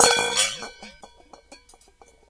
PliersBottom4-SM58-2ftaway-1-5inBallDrop
I dropped a solid plastic 1 1/2-inch ball into the 9 1/2-ball at enough of an angle to give a little bit of a rolling action.